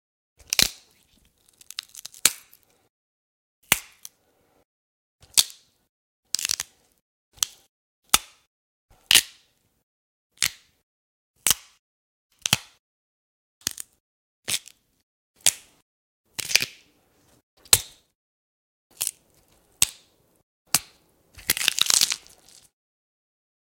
SoS SpookySounds BoneBreak01
Day 2 we recorded bone breaking sounds.
Go behind the scenes to see how these sounds are made:
Tune in Daily (from Oct. 26th-31st) to our Channel to go behind the scenes and learn how to create some spooky sound effects in time for Halloween!
Follow us: